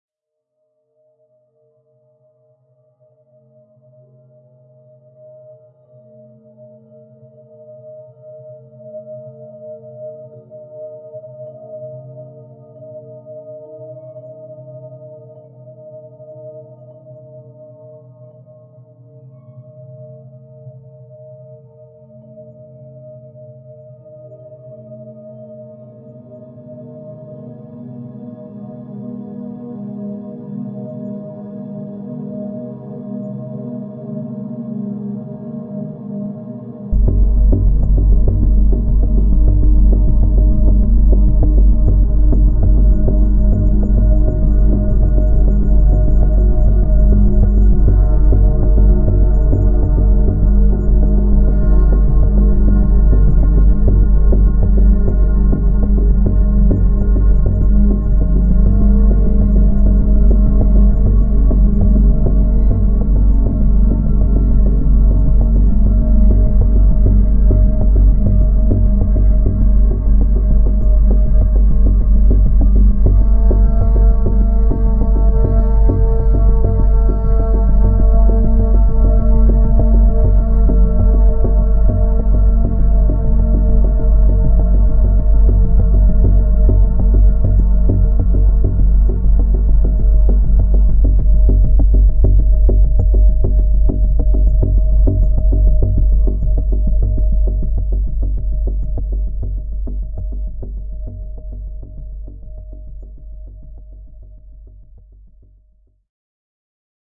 Sci-Fi Music Loop 01
Background, Dystopian, Space, Loop, Action, Movie, Science-Fiction, Cinematic, Ambient, Video-Game, Soundtrack, Post-Apocalyptic, Atmosphere, Apocalypse, Sci-Fi, Film, Atmospheric